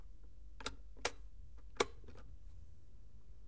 electric kettle trigger on off on
electric kettle, trigger switching: "on, off"; "on"
boiling, boiling-water, kettle, Kitchen, switching, trigger